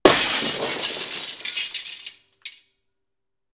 Windows being broken with vaitous objects. Also includes scratching.
break, breaking-glass, indoor, window